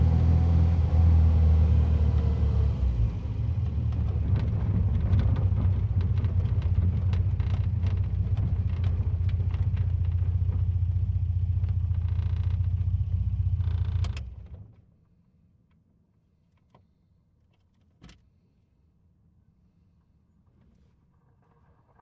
Car idle and turn off vintage MG convertable
Vintage 1970's MG convertible
off, car, engine, idle, turn